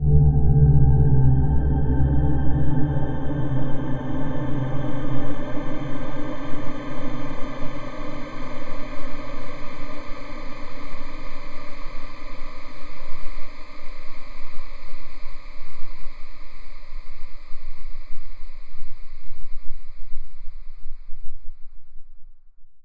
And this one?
126 The Shimmering D (polished)
A heavily processed dark drone, being the result of an Iris (birdsong) experiment.
Noise, Atmosphere, Dark, FX